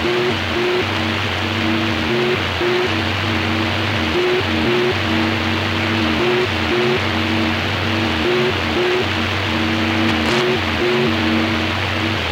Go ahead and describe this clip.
A loop made from a shortwave data transmission.
loop, shortwave